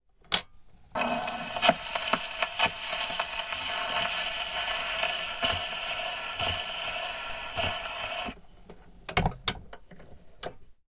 Content warning
This is an old gramophone with a 78rpm vinyl-record. The sounds include the start, the placement of the needle, crackle-noise and other surface sounds of the vinyl, lifted-up needle and the sound of the vinyl record player stopping.
Recorded in stereo on a Zoom H4 handheld recorder.
78rpm, crackle, warm-vinyl, dusty-vinyl, gramophone, gramophone-noise, vinyl, record, needle